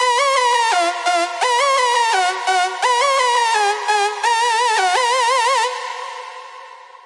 Lead 1 F Sharp Major
170-BPM, F-Sharp-Major, Hardcore